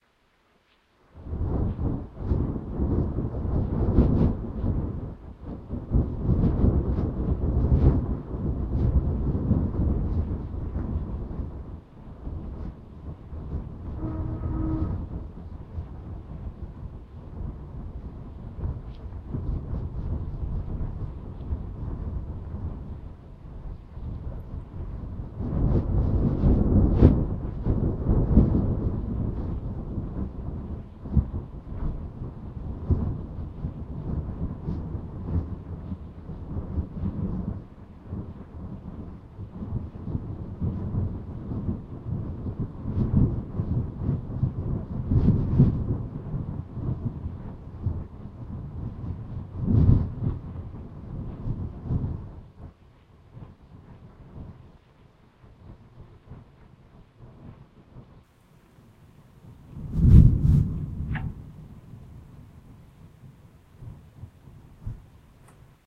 Thunder roll 05
This is a recording of distant rolling thunder from a thunderstorm that the Puget Sound (WA) experienced later in the afternoon (around 4-5pm) on 9-15-2013. I recorded this from Everett, Washington with a Samson C01U USB Studio Condenser; post-processed with Audacity.
ambient, field-recording, lightning, north-america, storm, thunder, thunder-clap, thunder-roll, weather, west-coast